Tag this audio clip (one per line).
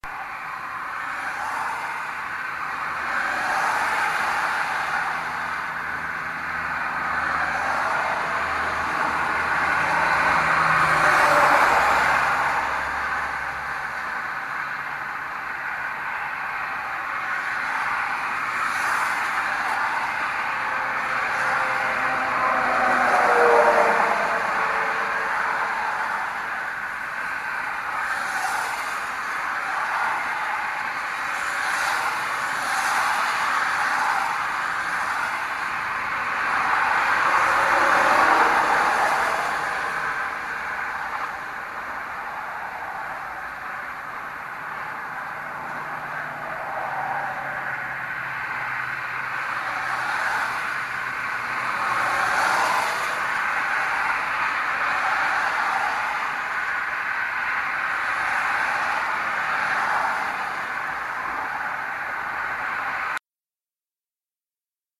trucks
wet